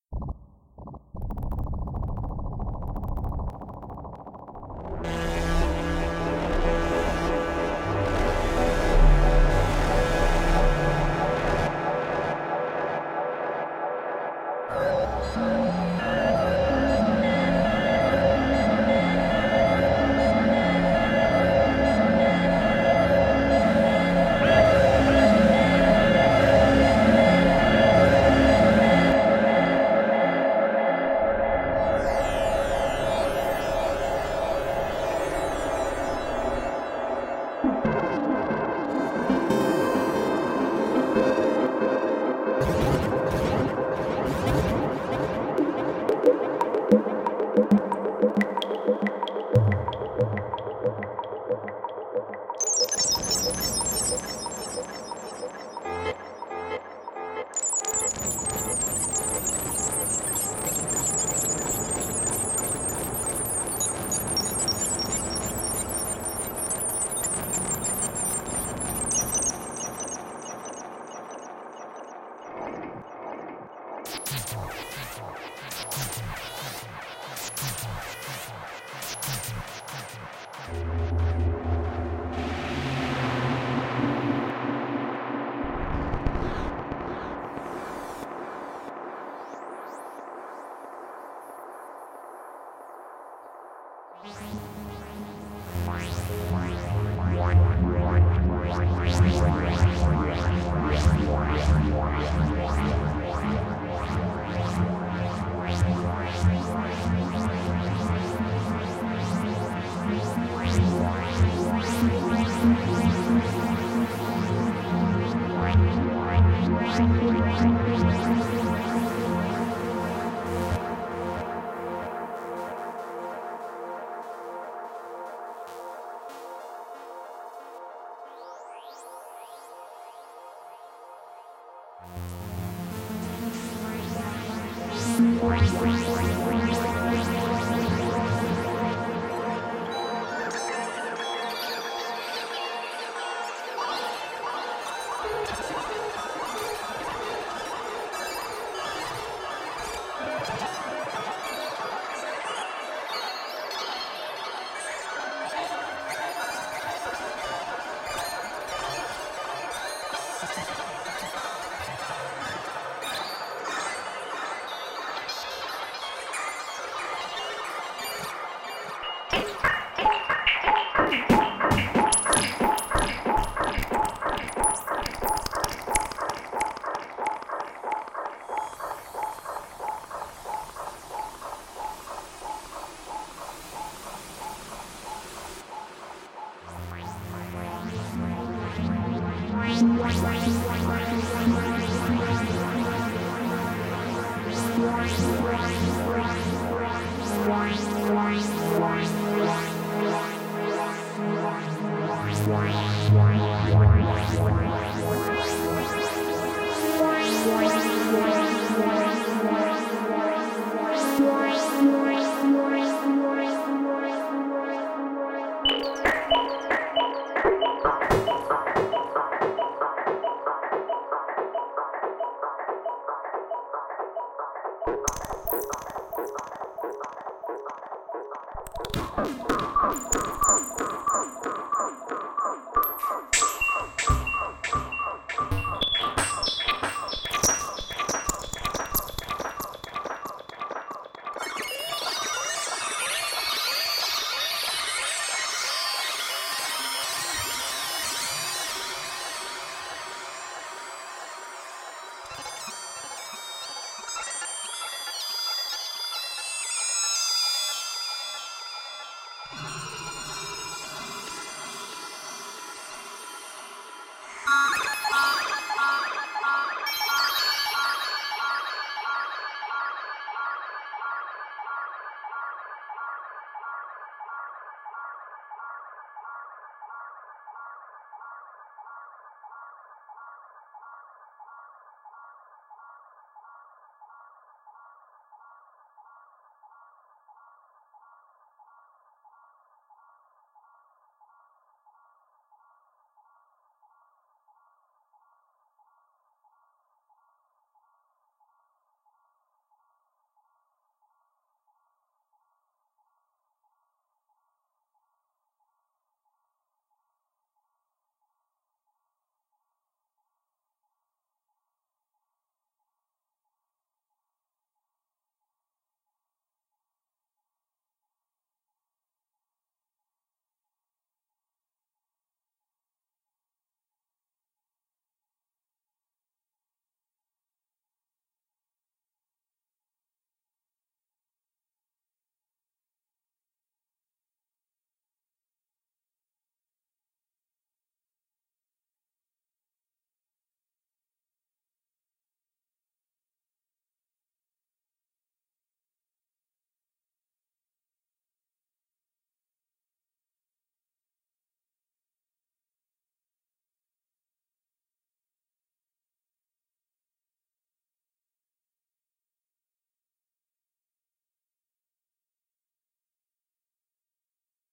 Session Two
Psychedelic (EXPERT MODE)
effect, samples, sound